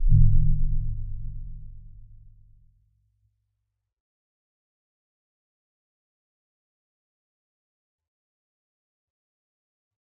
Very bass single beat
bass, beat